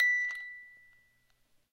MUSIC BOX B 2
13th In chromatic order.
music-box, chimes